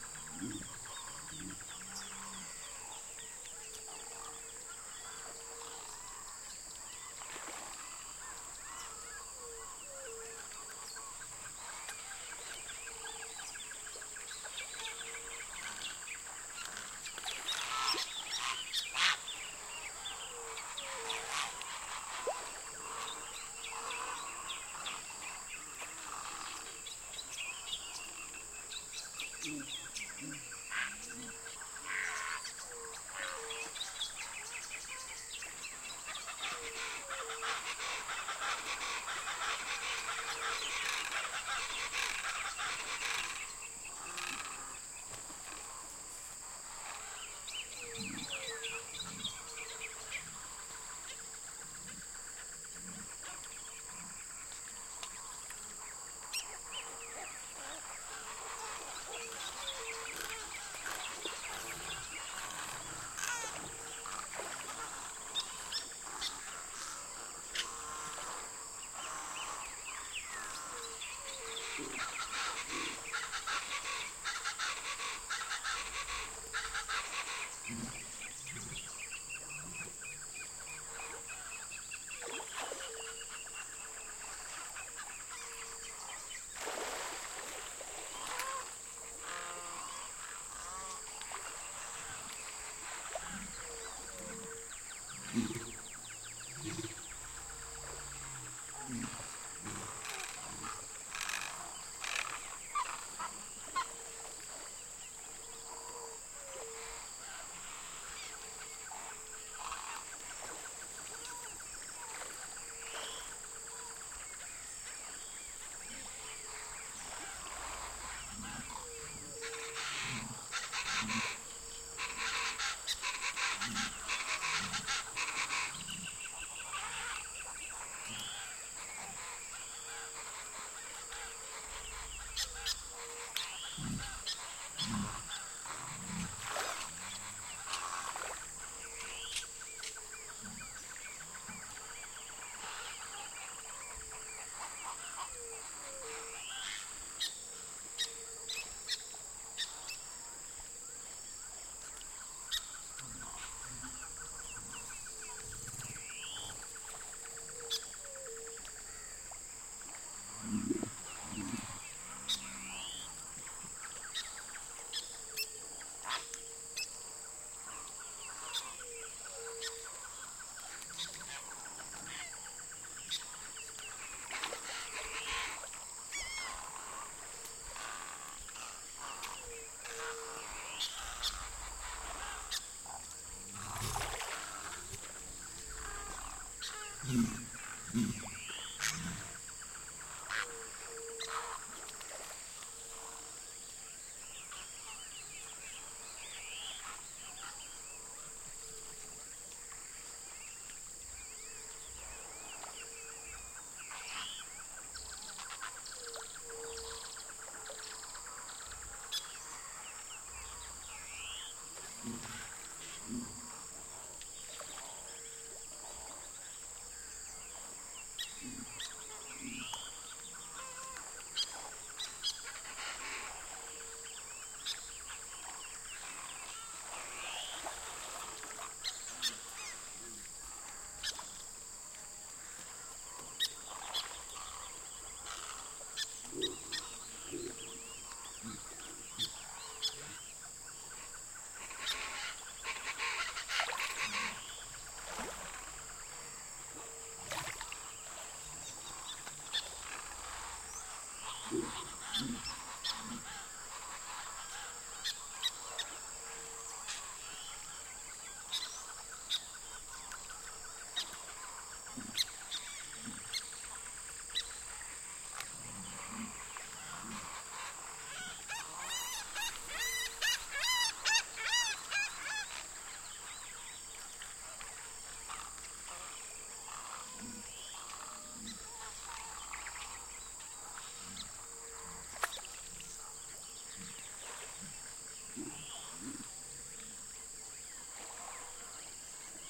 Brasil Pentanal wetland Jacare birds water 02
In the middle of the water in the Pentanal swamp surrounded by Jacare and Birds.
XY Stereo mic: Audio Technica AT825 Recorder: TEAC DAP1
Birds, Brasil, field-recording, Jacare, Pentanal, Swamp, water